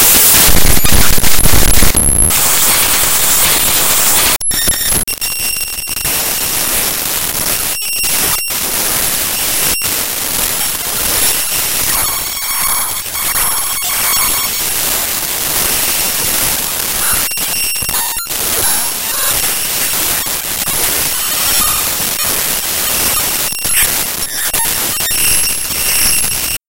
Raw import of a non-audio binary file made with Audacity in Ubuntu Studio
binary, computer, data, digital, distortion, electronic, extreme, file, glitch, glitches, glitchy, harsh, loud, noise, random, raw